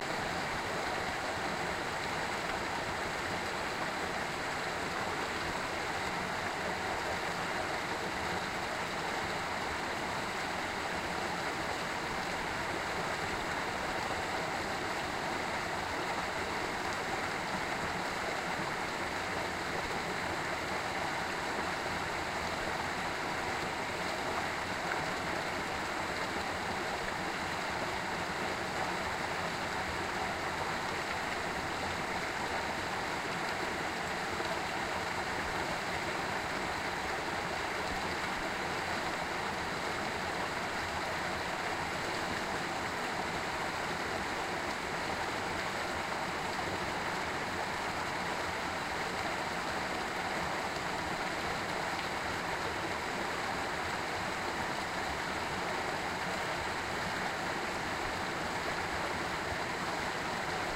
torrent Besseyres 3
water streams recordings